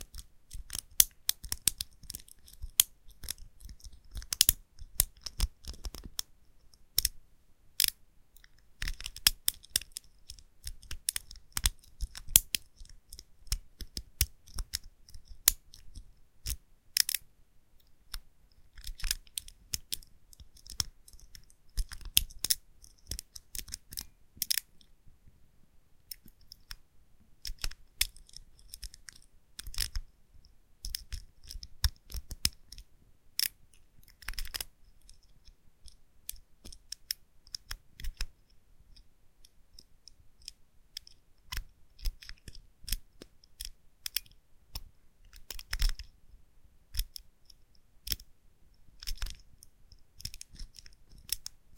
Lock 1 - Lock Picking 2
Pad lock being picked